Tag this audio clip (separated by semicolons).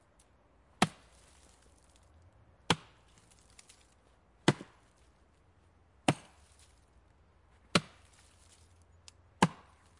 a; ax; chopping; cut; drvo; drvose; forest; sekira; wood; woodcutter